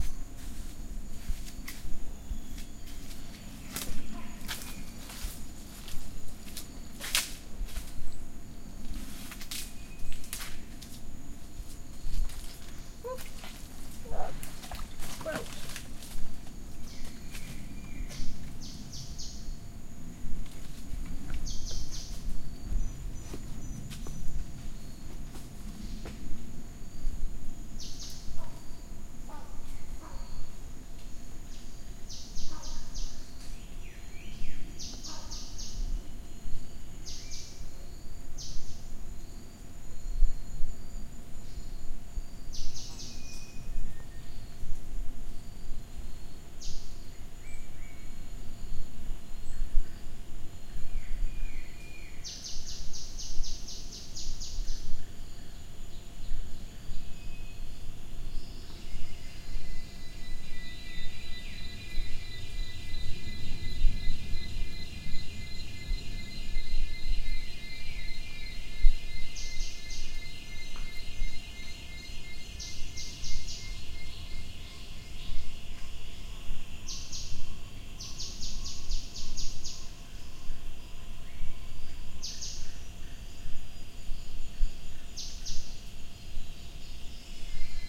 taman negara incoming motorboat
sounds of birds insects and miscellaneous rainforest creatures recorded in Malaysia's stunning Taman Negara national park. Uses the internal mic on my H4 Zoom.
jungle, birds, field-recording, engine, insects, rainforest, malaysia, boat